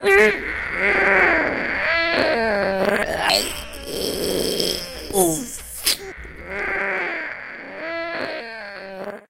Hercules Heracles Squirrel Fictional Sound
herkules, grunt, push, effort, struggle, moaning, moan, groan, teeth, grunting